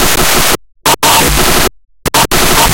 Loops and Such made from the Stickman DiSSorted Kit, taken into battery and arranged..... or. deranged?
beat, distorted, drums, harsh, heavy, like, metal, ni, processed, remixes, stickman, treated